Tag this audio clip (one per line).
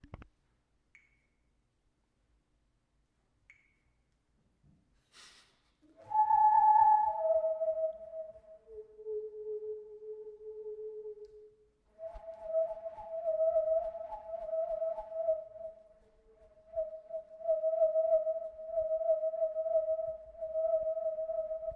hallow
tube
swinging